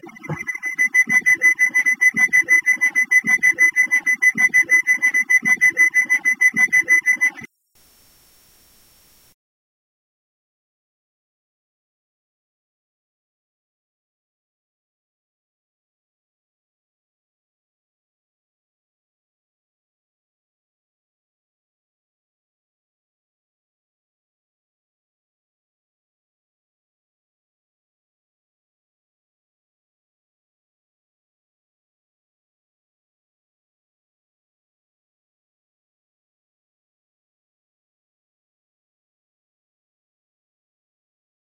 noise from the train door closing looped